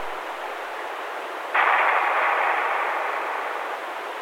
Simulated explosion over the radio.
aircraft, army, attack, battle, combat, explosion, fight, fighter-jet, military, pilot, radio, war